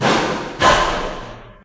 Bonks, bashes and scrapes recorded in a hospital.